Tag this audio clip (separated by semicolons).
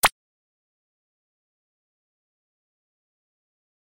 a; from; old; phone; Recorded; remixed; using; Vegas